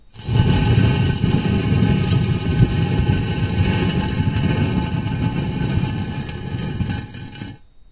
Cinder block scraped across a concrete floor. Sounds like tomb door opening. Recorded with a Tascam DR-40 and condenser mics.